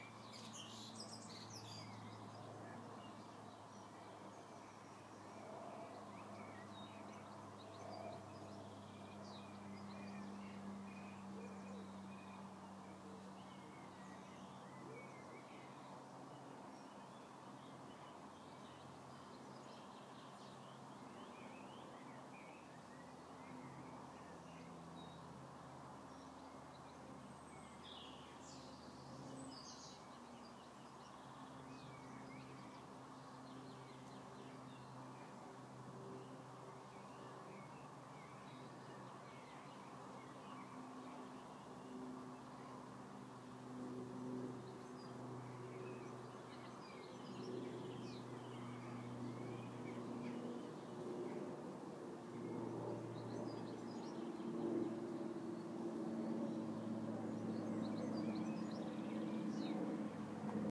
garden noises, birds and background traffic hum